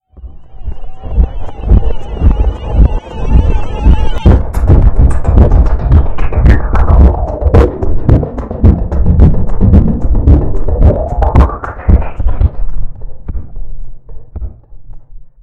I recorded myself beat boxing and added like 90+ effects. It was used to make "alien song"